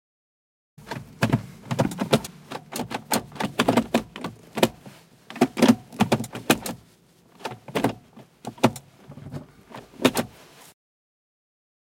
MITSUBISHI IMIEV electric car GEAR SHIFTER
car, electric, GEAR, SHIFTER